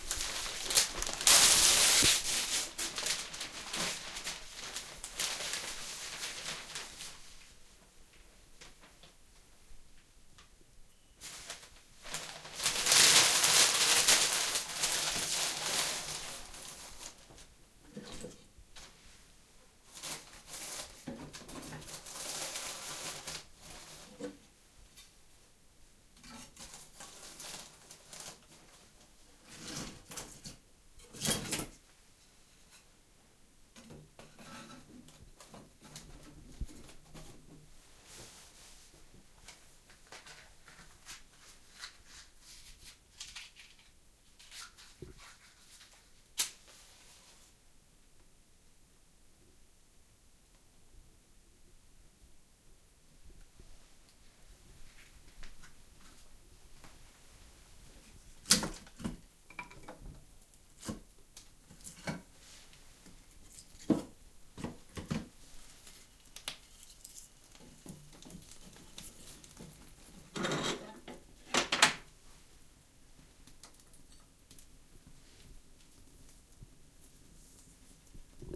Lighting fire in an old wood-heated stove. Preparing wood and paper, lighting with match. Recorded in an old house in Nykarleby, Finland, with ATR25 stereo microphone to Sony minidisc recorder.
field-recording, fire, household, lighting, match, paper-rustling, stove, wood